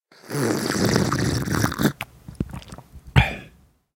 Drinking a glass of water. Cleaned with floorfish.